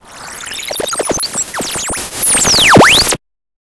Strange electronic interference from outer space. This sound was created using the Waldorf Attack VSTi within Cubase SX.